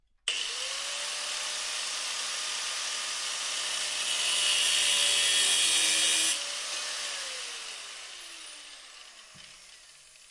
01-1 Angle Grinder
angle, CZ, Czech, grinder, Panska